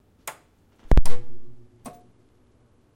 tv click on or off
Click on and off of old CRT television (2010). Sony M10.
off, turning, tv